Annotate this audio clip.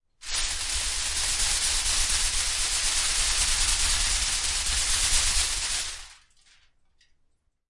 Aluminium Foil, Shaking, A (H1)
Raw audio of shaking a large piece of aluminium foil. I recorded this simultaneously with a Zoom H1 and Zoom H4n Pro to compare the quality.
An example of how you might credit is by putting this in the description/credits:
The sound was recorded using a "H1 Zoom recorder" on 31st October 2017.